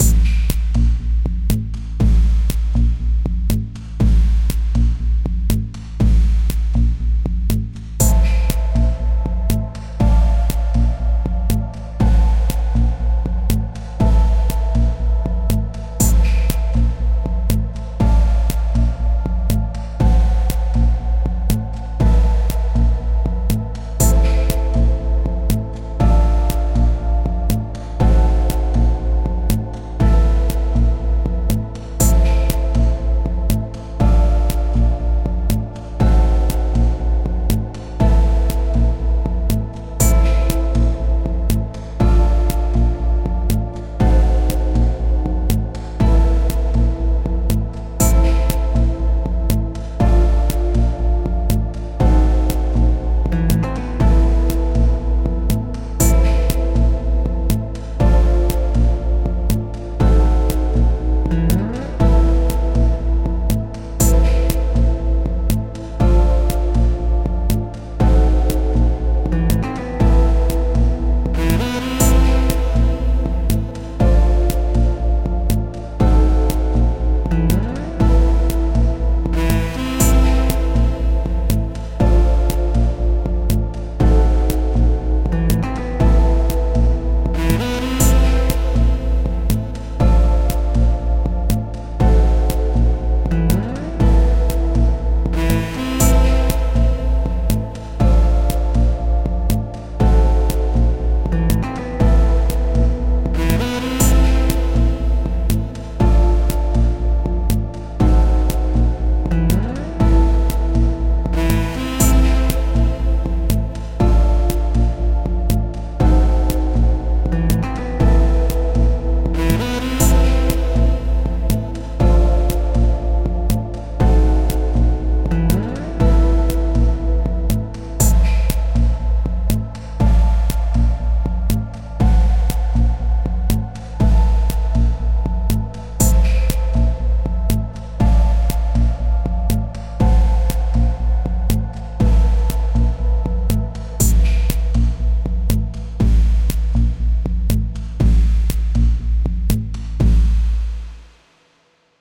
cool swing.
Synths:Ableton live,reason,kontakt.
Sounds download: